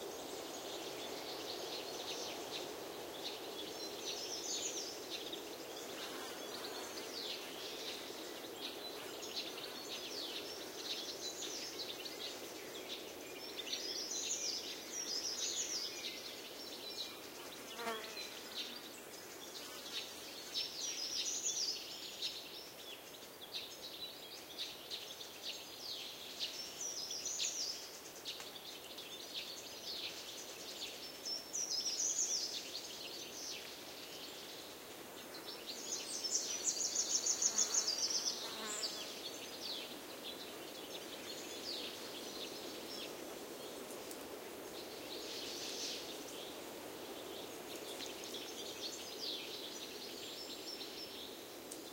20080528.forest.wind.insects
Pine forest ambiance in spring, with birds singing, breeze on trees and flying insects. The sound of insects is in contrast with the cleanness of bird songs, whereas wind, as usual, blows indifferently. Sennheiser MKH30+MKH60 into Shure FP24 and Edirol R09 recorder. Recorded near Hinojos, S Spain around 11AM
spring, field-recording, birds, nature, forest, ambiance, south-spain